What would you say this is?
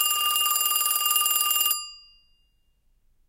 Phone Ringing #2
noise, phone, ring, ringing